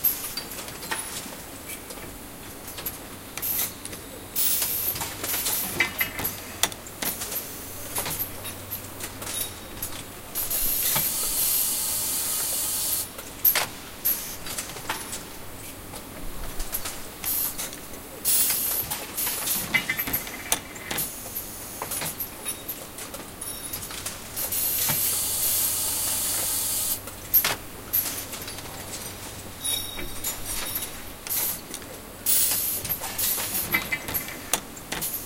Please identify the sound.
Usine-3-machines-récentes
Sounds recorded a few years ago in Le Mans. Semi automated line for car parts production.
mechanical, industrial, machinery, factory, plant, france, machine